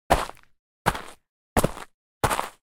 Hiking Boot Footsteps on Gravel
Four footsteps on crunchy gravel with hiking boots. Each step is separated by about 250 ms of silence.
Recorded with a H4n Pro 06/06/2020.
Edited with Audacity.
step, field-recording